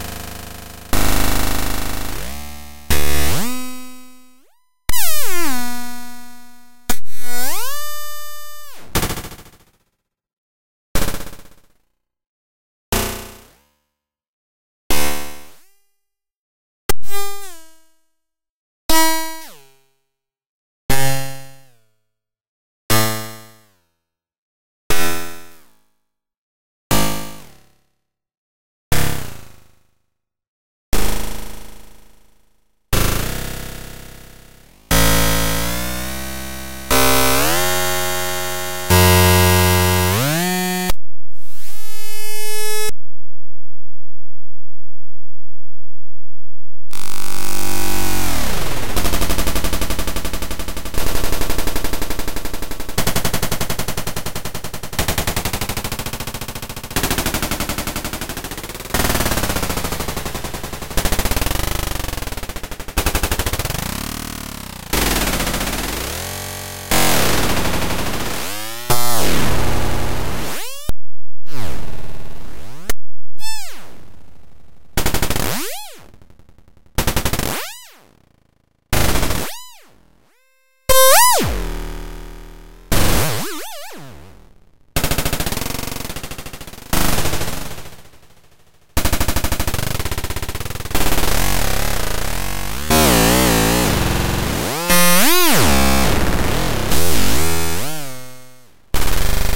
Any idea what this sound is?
Retro Synthetic Lo-Fi Percussive Sounds
Synthetic, pong-like percussive sounds ideal for retro video games and lo-fi 8bit aesthetics.
Created and recorded with Super Collider programming platform.
8bit, collider, game, lo-fi, percussive, pong, retro, super, synthesizer, synthetic, video